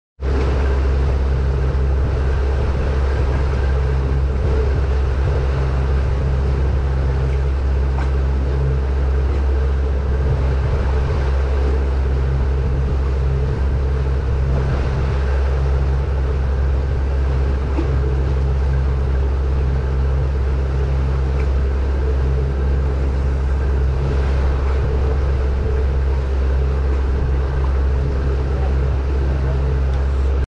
2x115HP Yamaha
I recorded the sound of a twin engine motor boat on a boat trip in the ocean. These are twin 115HP 4 Stroke Yamaha engines
boat, boat-engine, boat-running, engine, engine-running, motor, motor-boat, suzuki, suzuki-engine, yamaha, yamaha-engine